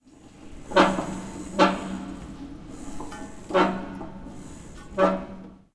A rotating metal fan that is part of an art piece. The fan was very jagged and heavy and had a lot of grease applied to it to keep it rotating. Even with the grease it still made a fairly good squeaking sound when turned.
aip09, art, art-piece, fan, metal, metal-work, revolution, rotate, squeak